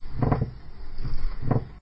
Wooden bench in sauna cracking 2
Wooden bench in sauna cracking
wood, sauna